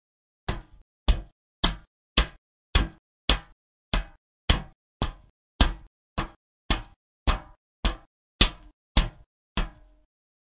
Footstep Metal
Footsteps recorded in a school studio for a class project.
walking, walk, step